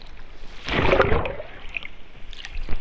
bolivar going underwater
this is what it sounds like when you stick a hydrophone in the water
bubble, hydrophone, bubbles, water, underwater, submerged, bubbling, crunch